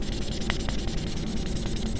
Noisy sound obtained by recording a marker drawing on the blackboard.
campus-upf, blackboard, UPF-CS13, draw, marker